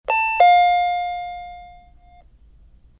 "Electric Eye" entry chime, suitable for any convenience store, liquor store, dry cleaner's storefront, or any similar strip-mall small retail shop.
I was working on a project, and needed a suitable sound for a 'convenience store' style entry chime. Walk through the light beam, and the chime rings out to let the person in the back know that a customer has come in. Since these systems in real life come from probably hundreds of different manufacturers, it's hard to pin down exactly "the sound".. especially since there's so many.
This sound hits all the right notes for what I think of, when I think of going to a mom-and-pop convenience store.
Sound is available in three versions:
Based on "Door Chime 3" by Taira Komori